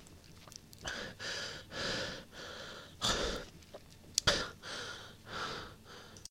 Breathless Panting 1
A young adult male panting and struggling to breath after being choked or over-exerting himself.
This was originally recorded for use in my own project but here, have fun.
human, male, breath, breathe, coughing, man, panting, breathing, choking, voice, vocal, breathless